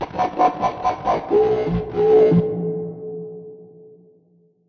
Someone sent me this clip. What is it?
Robot vocal - Ba ba ba ba ba ba boom boom reverb
Robot vocal - Ba ba ba ba ba ba boom boom including reverb
Processed using Audacity